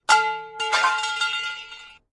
Iron pole falling on concrete
The sound of a single, heavy iron bar dropped on to concrete and bouncing.
Created by taking one of the pole sounds, pitch shifting it down, and then lengthening the bounces to simulate a larger, heavier pole.
drop, steel, metallic, poles, iron, metal, clang, scaffolding